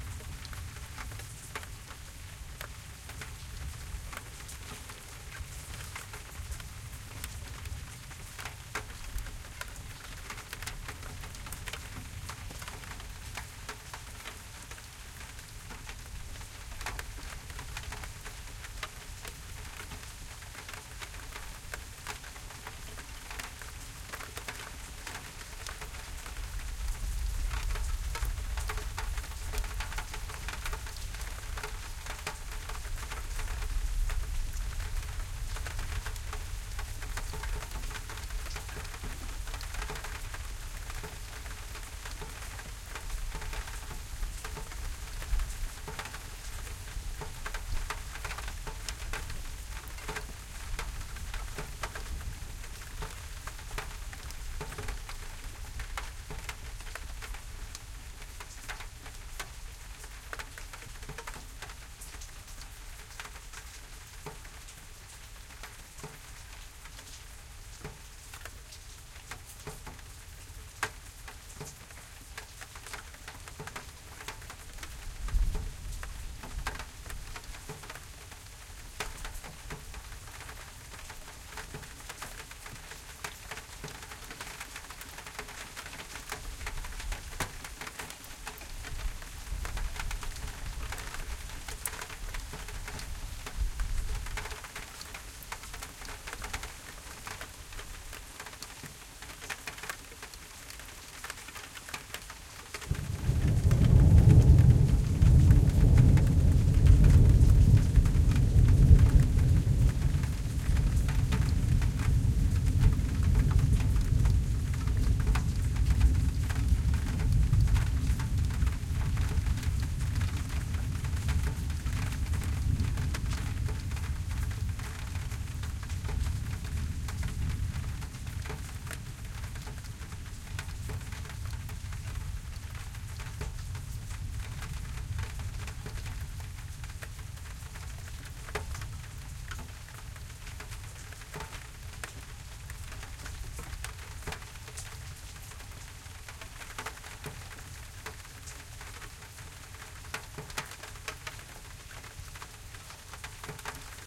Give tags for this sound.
raindrops; raining; roof; shower; thunder; window

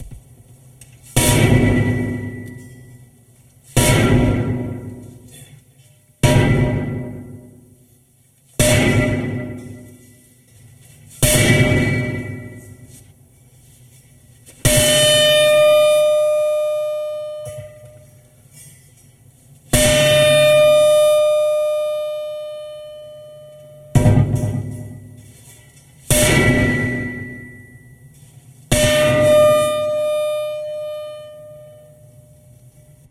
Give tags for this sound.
percussion; clank; sci-fi; scary; horror; distortion; steel-pipe; resonance; metal; impact; metallic; smash; hit; sustained; steel; ping; drop; ringing; clang; metal-pipe; cinematic; strike; industrial

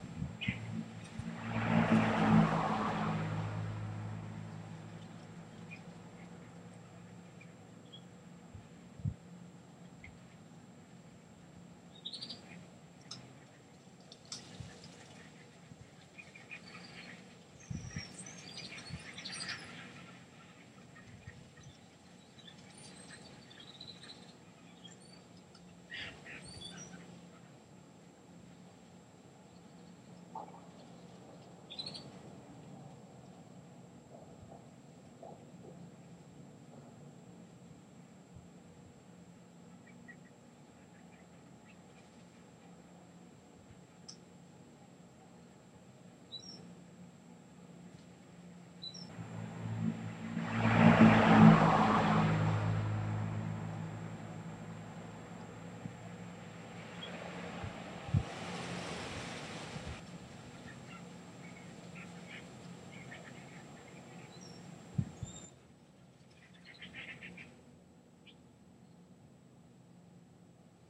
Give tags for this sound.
ableton-live; brids-cars-night; field-recording

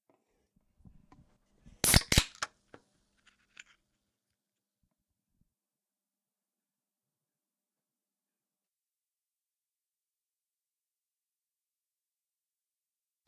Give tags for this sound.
aluminum; can; open; opening; pop; soda

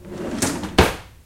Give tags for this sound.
cutlery; kitchen

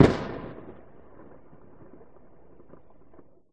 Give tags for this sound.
boom; explosion; fireworks; thunder

